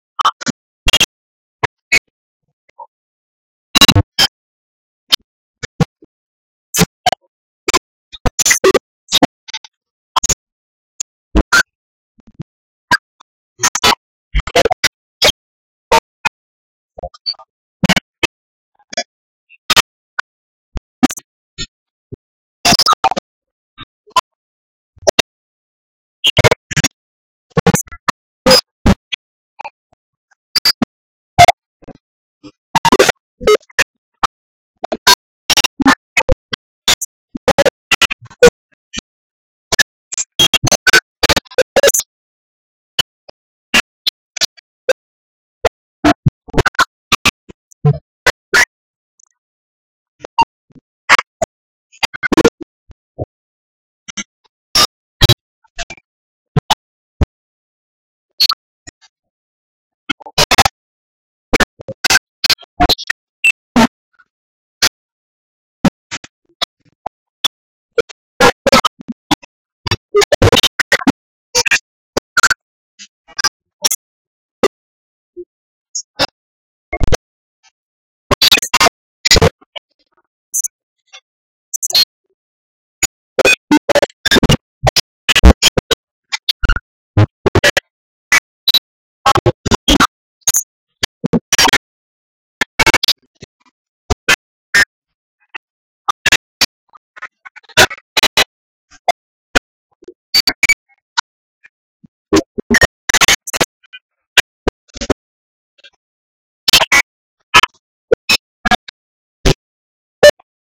Video codec audio glitches
Audio glitches of a video conversion from mkv to mpg file.
errors, glitches, noises